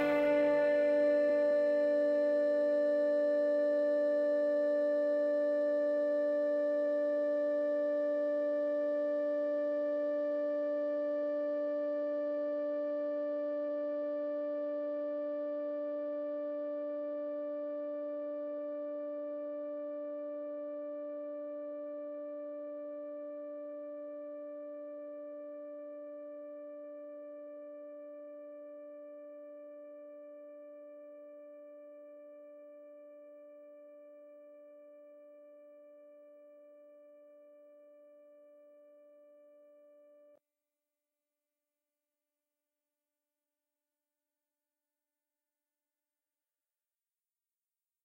Drone 1 High
Just a cool fade-out for a project I was working on. Stringish, with a lot of reverb. Pitch-shifted up an octave. A D note.